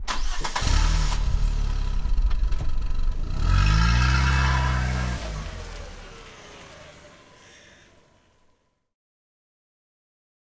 Car Engine Starting.
starting, start, car, motor, engine, ignition, vehicle, drive, automobile